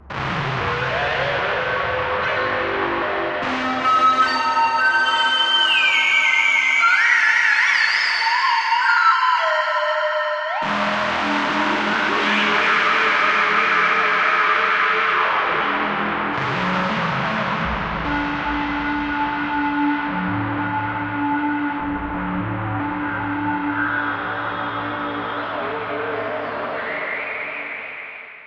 Time-Stretched Electric Guitar 7
An emulation of an electric guitar, synthesized in u-he's modular synthesizer Zebra, recorded live to disk and edited and time-stretched in BIAS Peak.